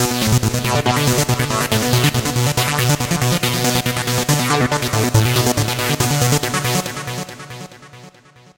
Psy Trance Loop 140 Bpm 06
goa, Loop, Psy, psytrance, Trance